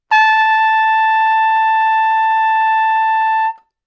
overall quality of single note - trumpet - A5
Part of the Good-sounds dataset of monophonic instrumental sounds.
instrument::trumpet
note::A
octave::5
midi note::69
tuning reference::440
good-sounds-id::1050
dynamic_level::p